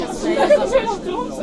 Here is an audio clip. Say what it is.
p1 11 gibber meisje
Sound belongs to a sample pack of several human produced sounds that I mixed into a "song".
voice, gibber, mixinghumans, mixing-humans, gibberish, sound-painting